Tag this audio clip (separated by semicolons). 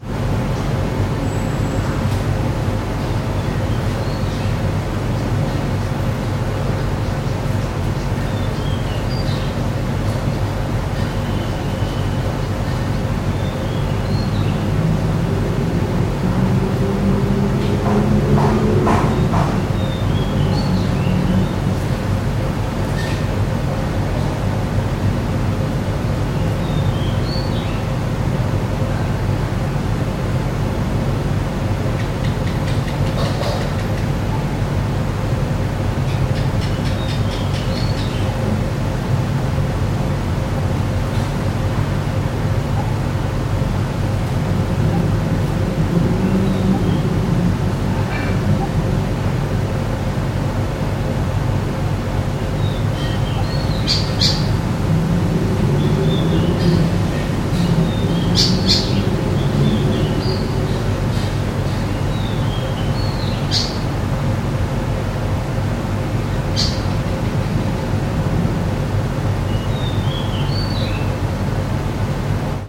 bird; home; thailand; traffic